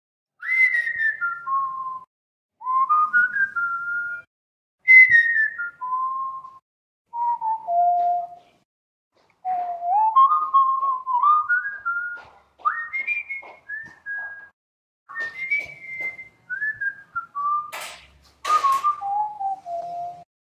Whistle Project
Whistling with a few effects